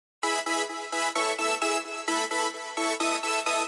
130 BPM Chords
These saw chords were created in Spire and processed using third party effects and plug ins.